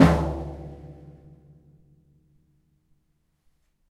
tom low
Individual percussive hits recorded live from my Tama Drum Kit
drum, kit, live, tama, tomtom